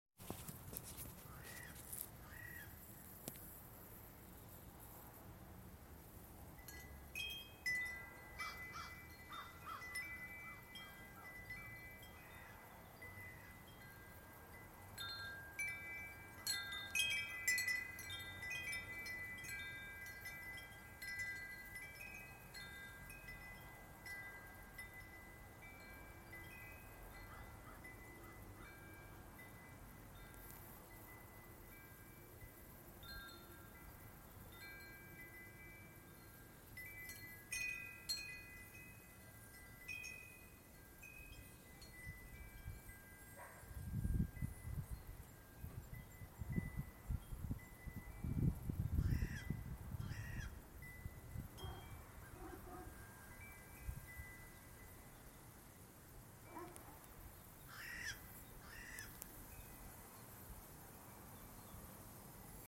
Suburb Morning Garden
Recorded at the White Lotus Zen Temple. The early morning sounds of birds, the wind, wind chimes and distant dogs barking.